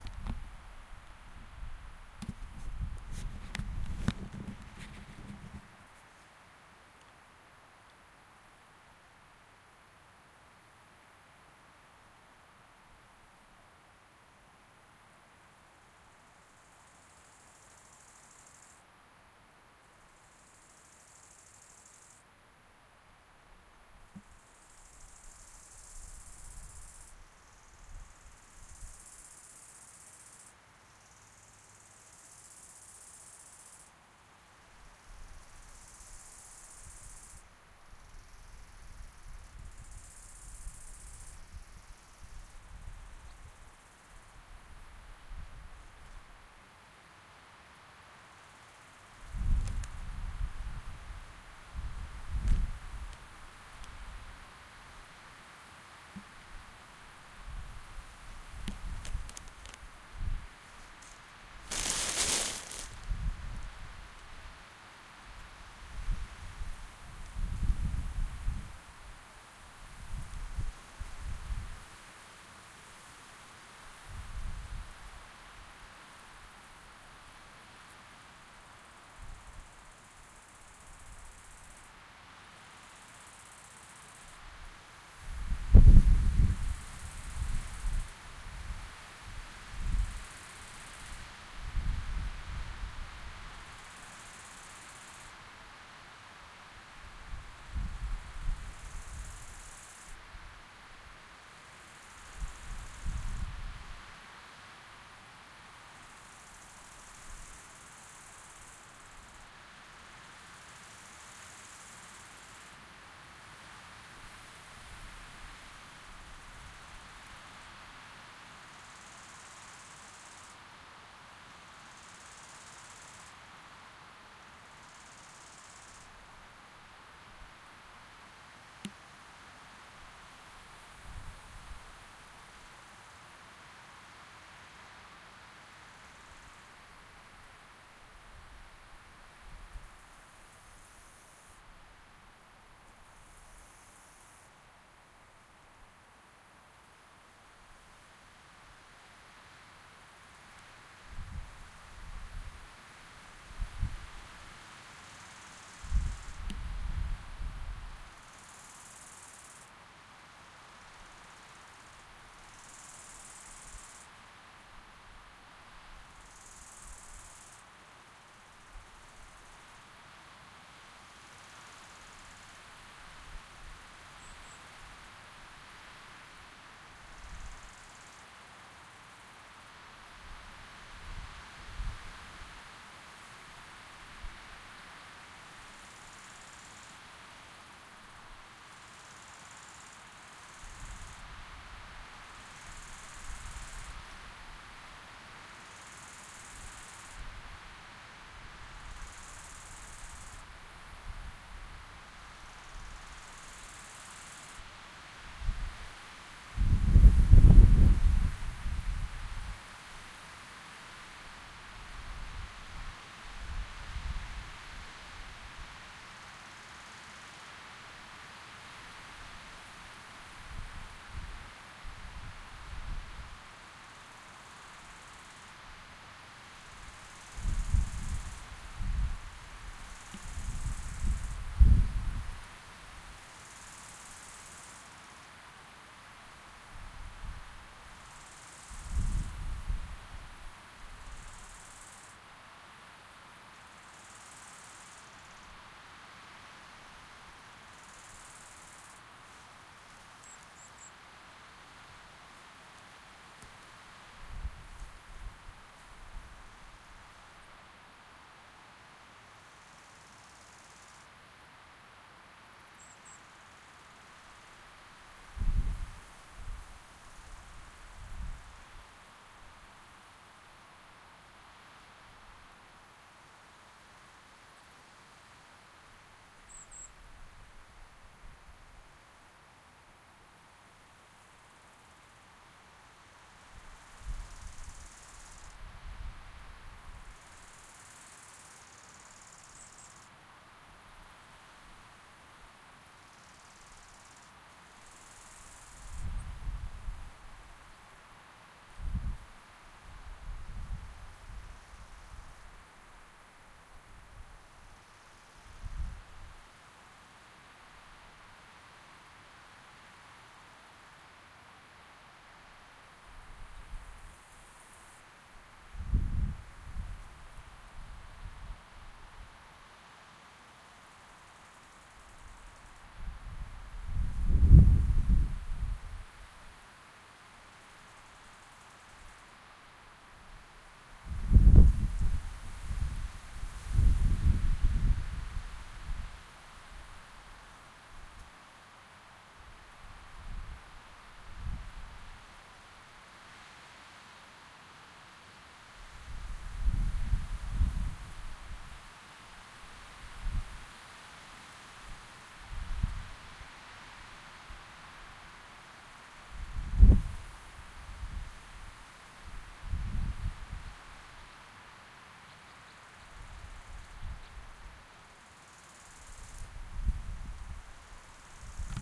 wind in the autumn forest - rear